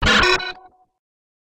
I used FL Studio 11 to create this effect, I filter the sound with Gross Beat plugins.
future, sound-effect, fxs, computer, freaky, robotic, electric, fx, lo-fi, digital, sound-design